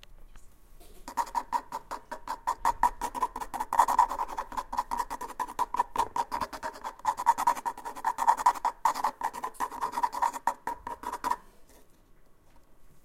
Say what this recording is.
mySound Sint-Laurens Belgium Bekertje
Sounds from objects that are beloved to the participant pupils at the Sint-Laurens school, Sint-Kruis-Winkel, Belgium. The source of the sounds has to be guessed.
Bekertje, Belgium, mySound, Sint-Kruis-Winkel